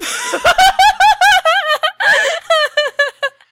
more laughing
Do you have a request?
female, voice